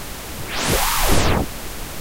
Chrome Logo on spectrogram
I took Google Chrome new logo and put into Audiopaint
Image, Picture, Chrome